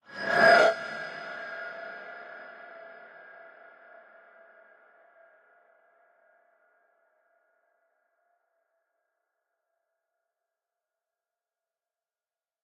Creepy HiFreq Woosh
hi frequency metallic woosh \ swish
creepy, effect, fly-by, freaky, fx, metall, sfx, swing, swish, whoosh, woosh